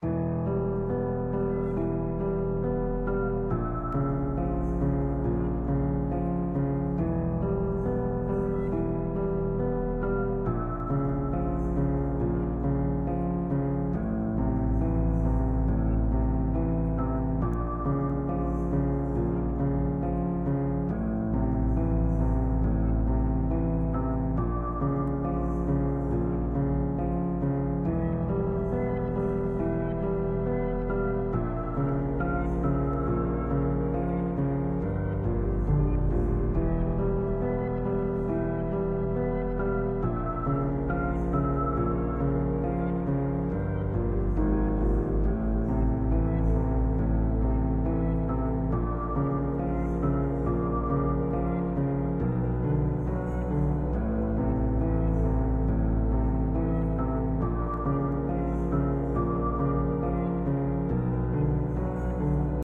Horror Background Music
Genre: Horror, Piano
I made horror music with my unique collection of presets. This was made on Holloween so there you go.